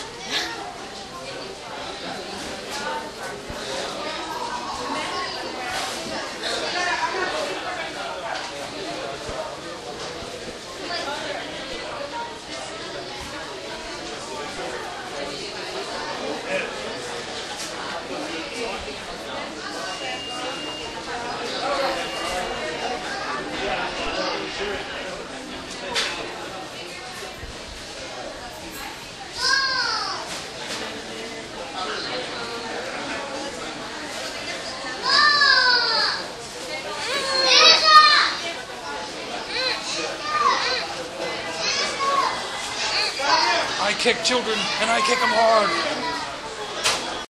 nyc esb mcdonalds
Inside the McDonald's across from the Empire State Building in New York City recorded with DS-40 and edited in Wavosaur.
field-recording, new-york-city, urban, ambiance